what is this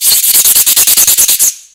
Polystyrene Scratching
Rubbing two pieces of polystyrene together.